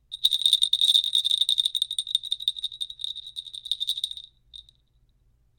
Jingle-Bell2
Waving a couple of jingle-bells, recorded with Neumann TLM103
jingle, santa, christmas